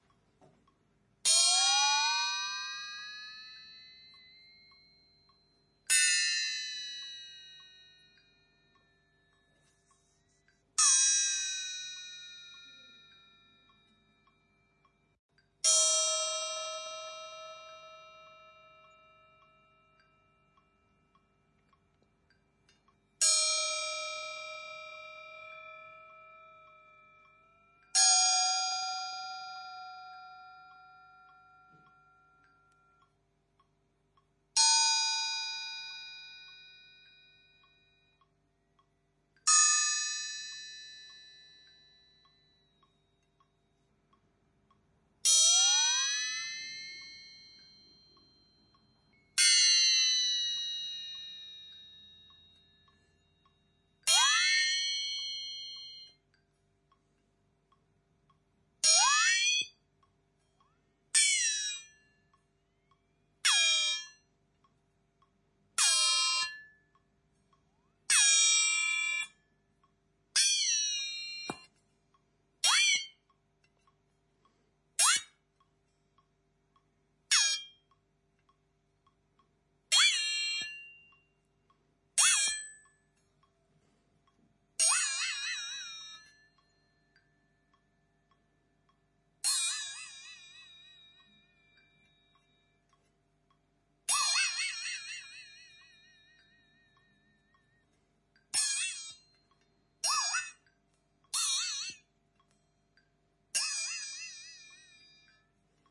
Bended Metal sheet boing sounds
I recently found this awesome sounding metal cover in my workshop and decided to do some high quality recordings with it. I used AKG C414, a Neve Portic 5012 preamp my RME Bayface and some slight postproduction (compression and slight EQ).
pong, boing, metalic, percussionmetal, metal, bending, ping, sheetmetal, bendmetal, glitchmetal